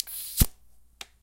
large Monster Energy Drink Can Top Opening 2
Another take of a Large Monster Energy drink opening after its tape is removed from its top.
Monster, can, energy, soda, power, energy-drink